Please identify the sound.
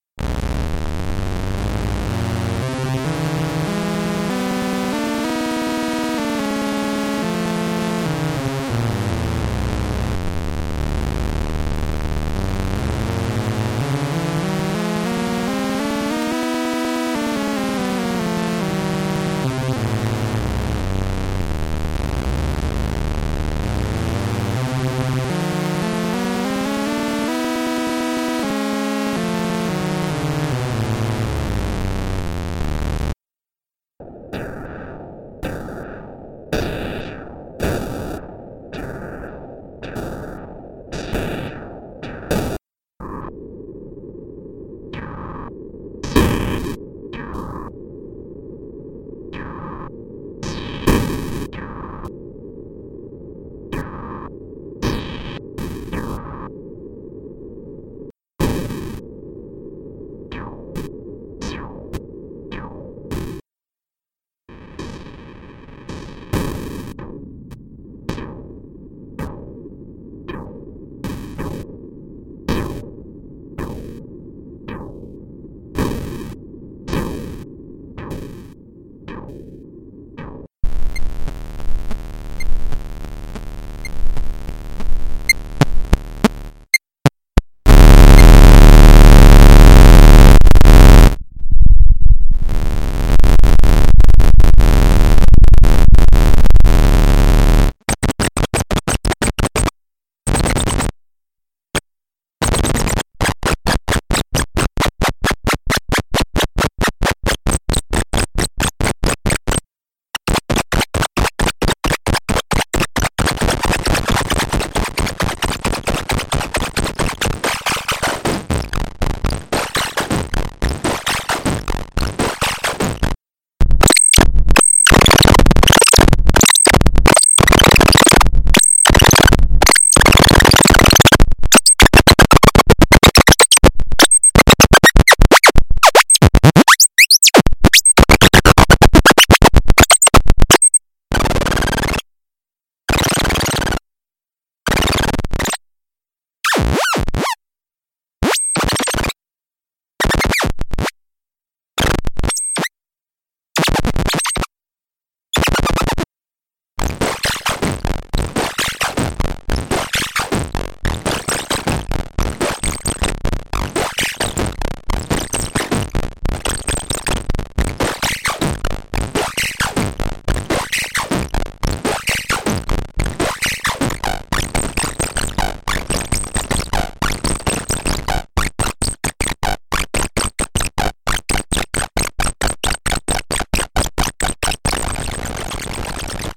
Another private dare file, created with Kamiooka VST modular in Ableton Live.
Sounds were created from 3 different patches. Each patch created in only a couple of minutes (I did not think too much about it).
Recorded a few minutes of audio from each patch, while fiddling with some of the knobs.
Edited in Audacity to convert to mono and create a comp file of the best parts with a total lenght of ~3min.
Actually I am quite happy with this sound, because it sounds similar to the kind of things that can be obtained from small synth/noisemakers like the Mute Synth I and II.